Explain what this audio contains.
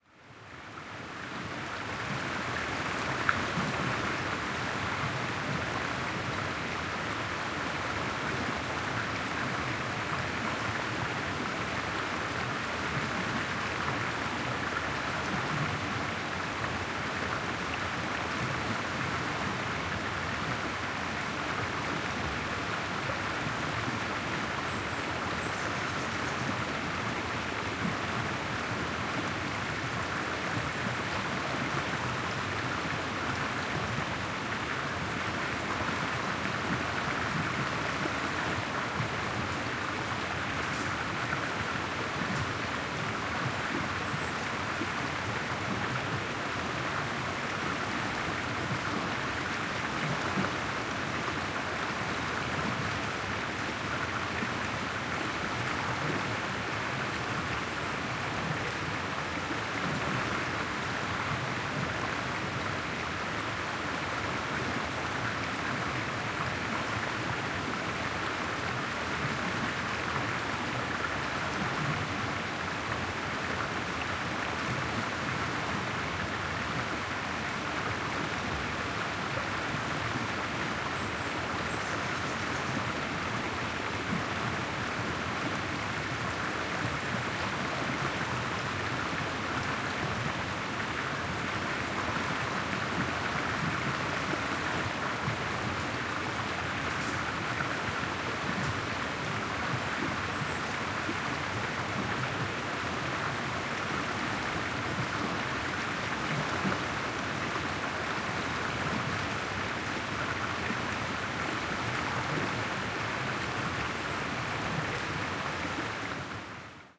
RIVER gurgling in forest
Mid size river recorded from the bank. Distant gurgle. some birds